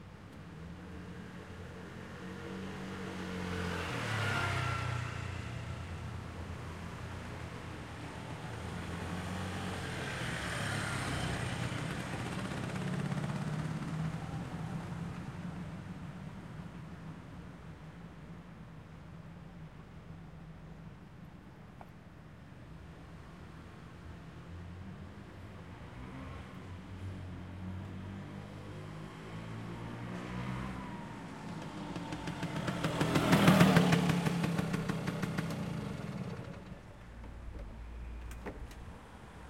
Vespa Piaggio passing 01
passing, piaggio